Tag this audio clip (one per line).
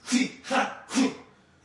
group
heave-ho
chant